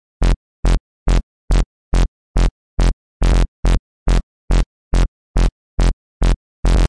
my bass audiosample, 120 to 140 bmp
trance, electronica, acid, dance